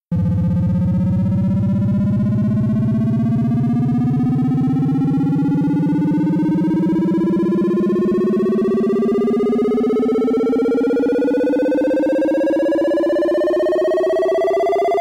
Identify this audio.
High Score Fill - Ascending Slow
Made for some motion graphics where a percentage was filling up and needed a sound to accompany that. Slow. Made using Reason.
fill, score